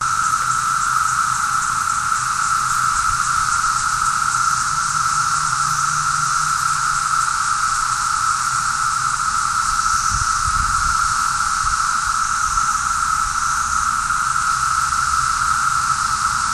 evening cicadas
Cicadas in the distance
cicadas, insects, nature, summer